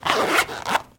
Bag-Hard Plastic-Zipper-Unzip-01
This was taken from a strange plastic bag-like container I found when recording some other sounds. I thought the zipper made a good sound, unfortunately I forgot to get one of the zipper being zipped up.